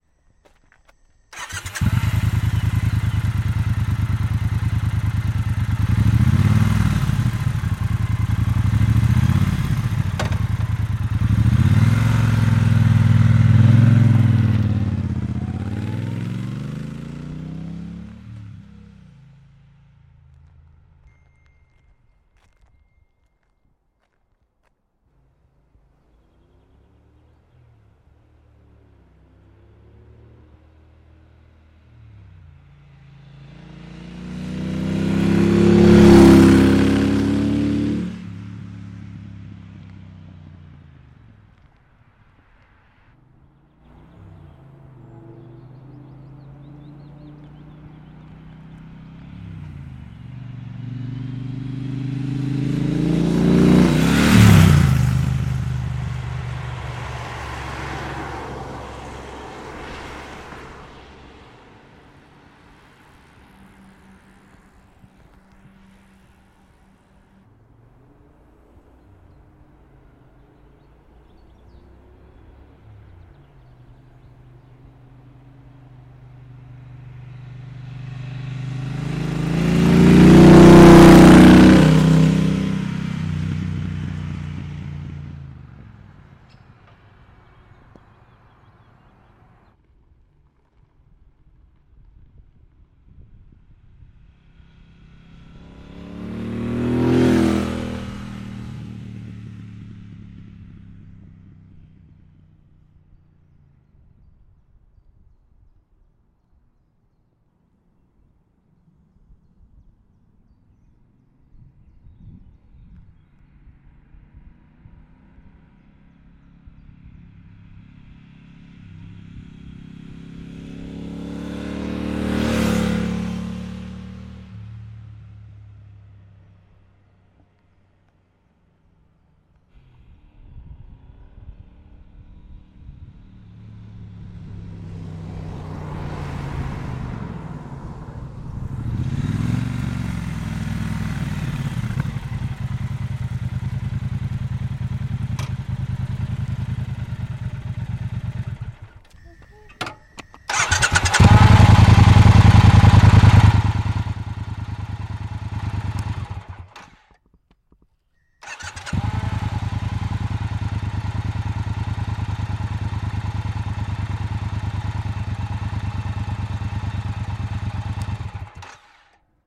sounds of triumph bonneville speedmaster motorcycle
various sounds of a bonneville speedmaster motorcycle
speedmaster, motorcycle, bonneville